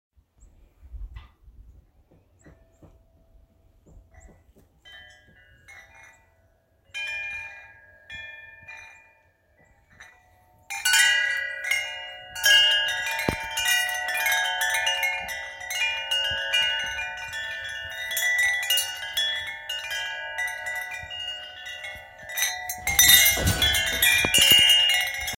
Wind chimes outside of my house being jingled around by myself. The thump at the end is my dog knocking a pan of rising focaccia bread off the stove.